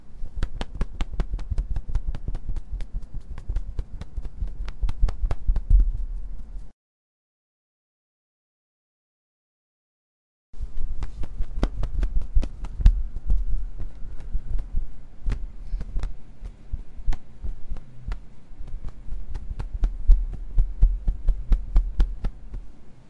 32-Aleteos (mezcla)
Field-Recording Water Animals
Field-Recording, Animals